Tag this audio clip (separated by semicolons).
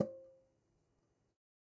closed; conga; god; home; real; record; trash